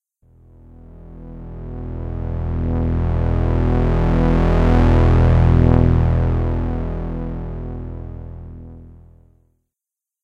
Troubleshooting the envelopegenerator of LMMS.
Attack/Decay at max values (~10 is a bit short...)
Envelope, lmms, Sawtooth